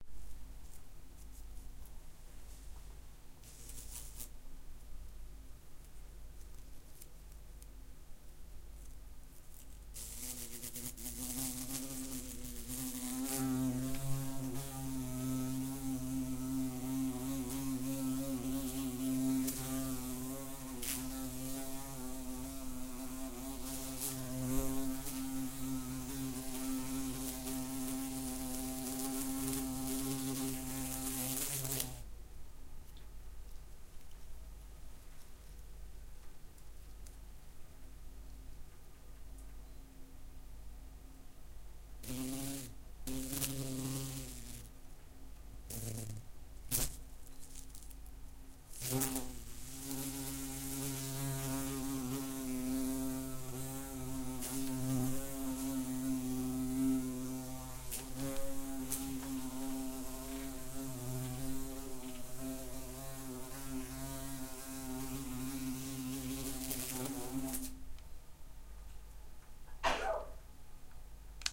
a wasp entered in my studio
BEE, BINAURAL, INSECT, WASP